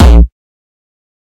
Distorted kick created with F.L. Studio. Blood Overdrive, Parametric EQ, Stereo enhancer, and EQUO effects were used.
hardcore, distorted, bass, distortion, techno, synth, kick, drumloop, trance, hard, progression, melody, drum, beat, kickdrum